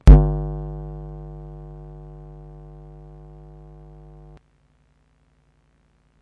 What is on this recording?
display magnetic field2
Magnetic field change recorded an changed to sound
change, display, field, magnetic, unit